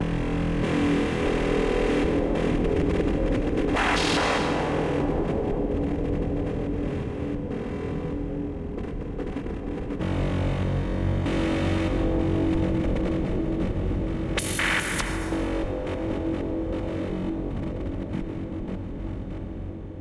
ambient 0005 1-Audio-Bunt 3
ambient, breakcore, bunt, digital, DNB, drill, electronic, glitch, harsh, lesson, lo-fi, loop, noise, NoizDumpster, rekombinacje, space, square-wave, synthesized, synth-percussion, tracker, VST